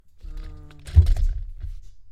uhm chairmove
a voice saying uhhhm, then a chair moving, some mike rumble. Recorded with Motu 896 and Studio Projects B-1. In the Anchoic chamber of the HKU.
anechoic, chair